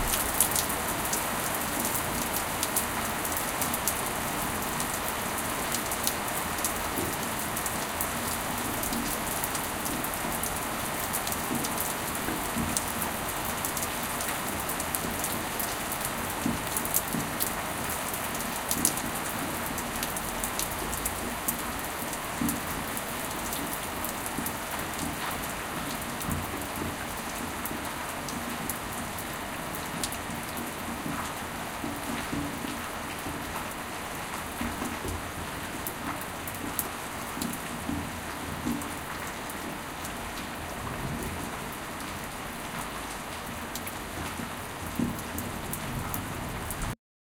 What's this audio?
Storm Rain 01
Just Regular Thunder rainy enviroment
High quality sound:
thunderstorm, weather, nature, rain, thunder, storm, field-recording, thunder-storm